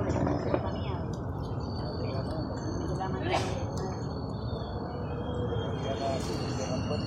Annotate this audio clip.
personas esquina club comercio SIBGA
Sonido de conversacion, registrado en el Parque Santander, Cl. 36 #191, Bucaramanga, Santander. Registro realizado como ejercicio dentro del proyecto SIAS de la Universidad Antonio Nariño.
conversation